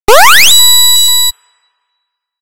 8-bit teleport sound.
8-bit, asset, arcade